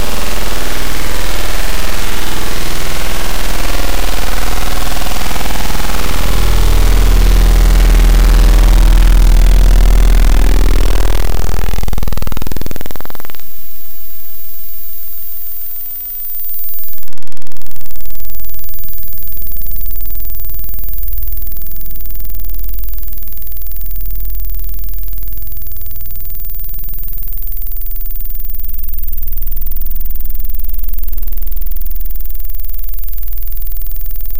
Sounds intended for a sound experiment.
derived from this sound:
Descriptions will be updated to show what processing was done to each sound, but only when the experiment is over.
To participate in the sound experiment:
a) listen to this sound and the original sound.
b) Consider which one sounds more unpleasant. Then enter a comment for this sound using the scores below.
c) You should enter a comment with one of the following scores:
1 - if the new sound is much more unpleasant than the original sound
2 - If the new sound is somewhat more unpleasant than the original sound
3 - If the sounds are equally unpleasant. If you cannot decide which sound is more unpleasant after listening to the sounds twice, then please choose this one.
4 - The original sound was more unpleasant
5 - The original sound was much more unplesant.